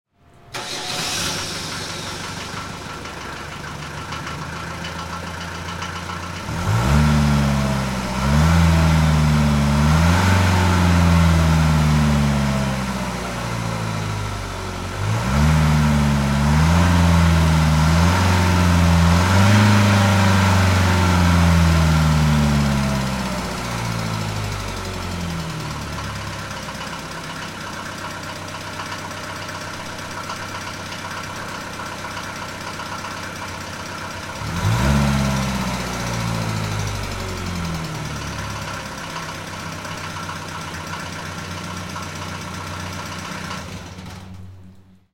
diesel mercedes 190 D
diesel, mercedes, engine
mercedes, diesel, engine